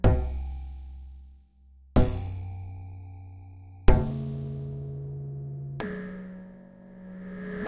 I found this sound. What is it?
Happy little kick drum beat